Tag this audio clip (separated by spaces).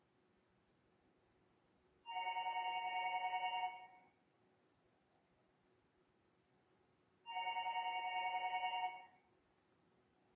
phone Ring telephone